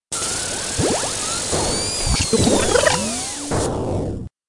Radio imaging created using Labchirp, Ableton Live, Audacity and Adobe Audition
wipe, bumper, radio, element, effects, sound, imaging, sting